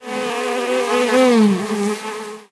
Bee Buzzing
Bee, buzz, buzzing, fly, humming